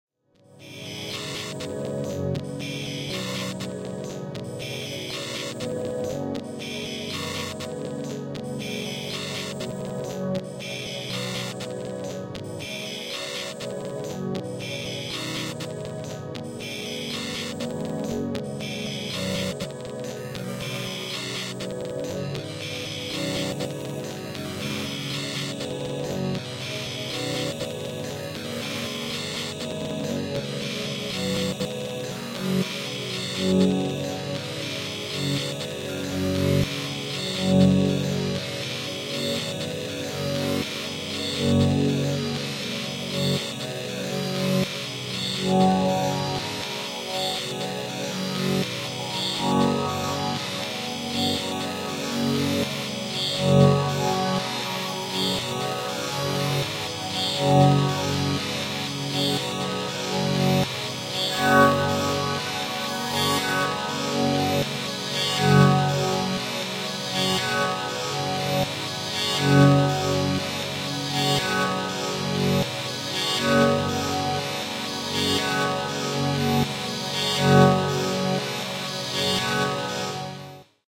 One of four somewhat related sounds, somewhat droning, somewhat glitchy. It's late, I hit record, the red light scares me.